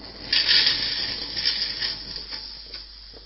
Water on sauna heater 4
Water on sauna heater